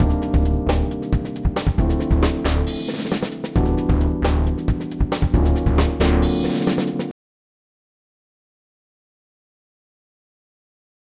beat combo 4 bo
jazzy funk with real drum and bass with keyboards.